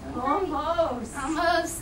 almost loop
Loopable snippet of sound taken from a stereo recording of a live birth on an Olympus DS-40 and barely edited with Wavosaur. File names loosely indicate vocal phrases being said.
nurse, loop